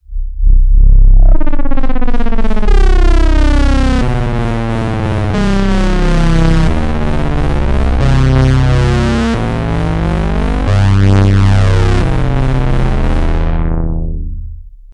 a hard upcoming sound-loop , u can use this one for a intro , or before another sound
hard, loops